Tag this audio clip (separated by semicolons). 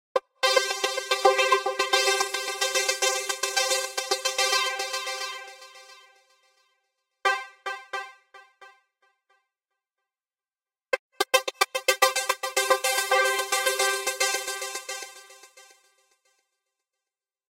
this-just-in; news; newscast; music; special-bulletin